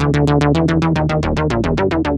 vintage synth 01-03-02 110 bpm
some loop with a vintage synth
synth, vintage